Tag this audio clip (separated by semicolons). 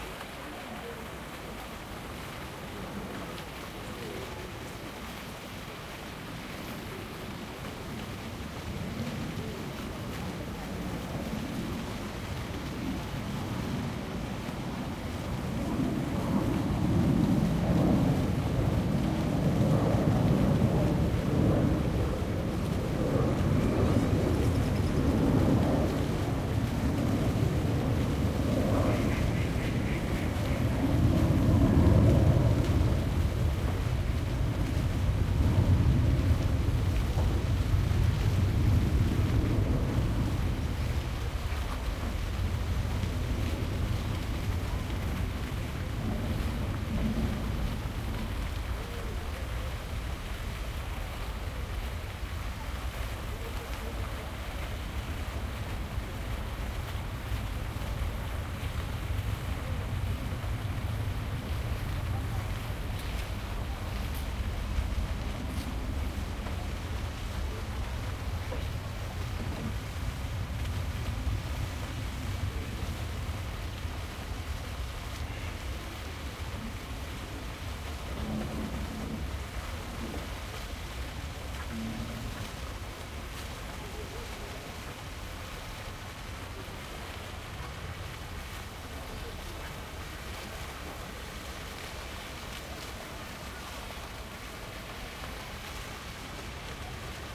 airplane; parc; plane